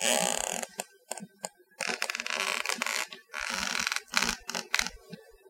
Some longer squeaks from ajusting my weight very slowly and bouncing.Recorded with a Rode NTG-2 mic via Canon DV camera, edited in Cool Edit Pro.